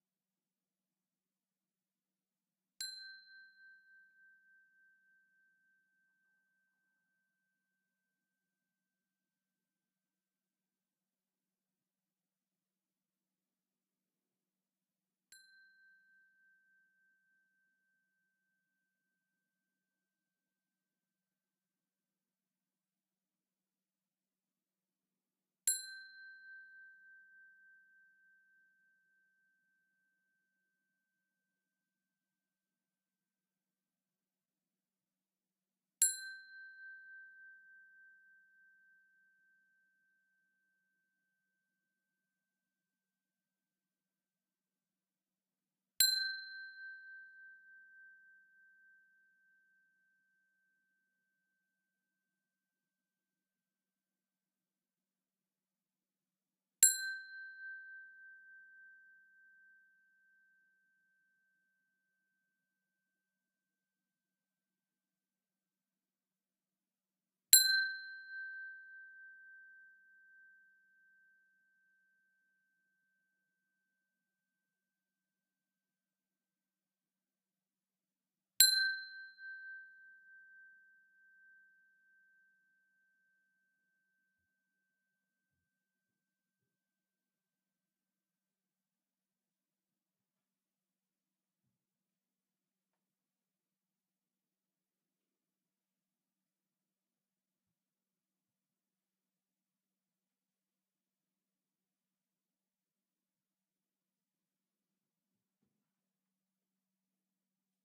Pipe-chimes-G5-raw
Samples takes from chimes made by cutting a galvanized steel pipe into specific lengths, each hung by a nylon string. Chimes were played by striking with a large steel nail.
Pipe, Samples, Chimes